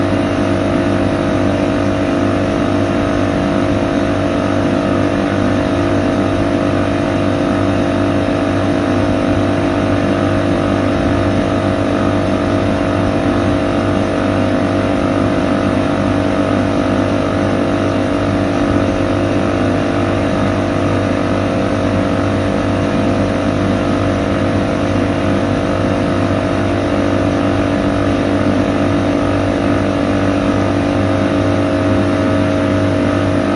Air Conditioning Engine
Seamlessly looping noise recorded from an air conditionening engine on top of a building.
air-conditioning, engine, hum, industrial, machine, motor, noise